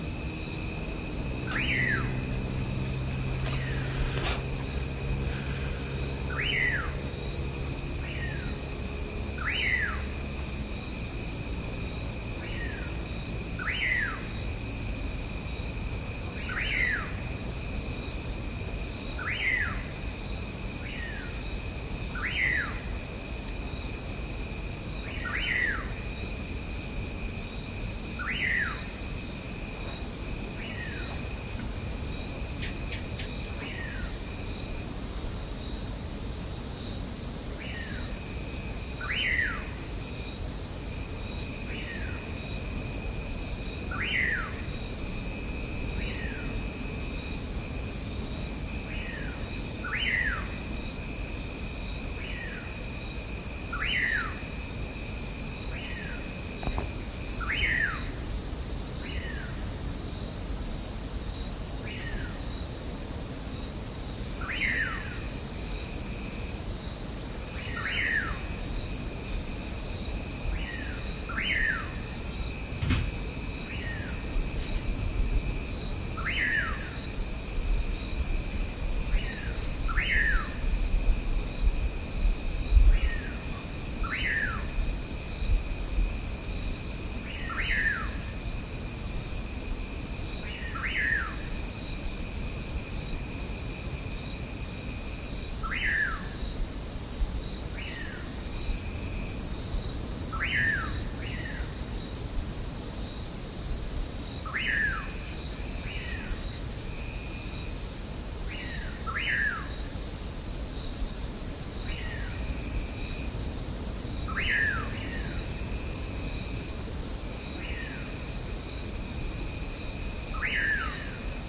Birds and bugs and other sounds at night in the mountains near Dominical, Costa Rica. Recorded December 2015 with an iPhone.